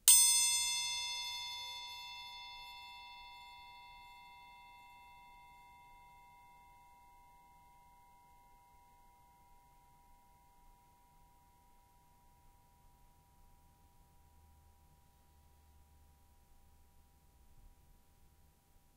Punch to music triangle.
Recorder: Tascam DR-40.
External mics.
Date: 2014-10-26.
musical, punch, triangle